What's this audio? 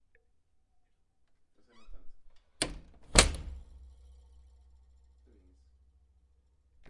sound of a wood door, closed as a person enter the house
close, door, wood